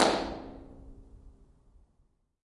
Doerener Tunnel 03
I recorded me clapping in my favourite tunnel of my village and made IRs of it
ambiance, convolution, Impulse, IR, natural, Response, Reverb, room, Tunnel